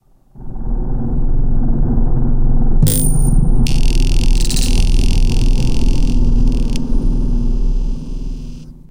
flips and snaps
A strange little sound effect cut together from processed recordings of hums, coin flips, and finger snaps in studio.
ambient, drone, bass, coin